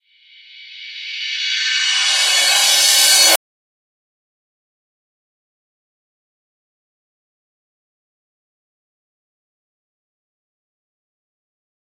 Rev Cymb 25
Reverse Cymbals
Digital Zero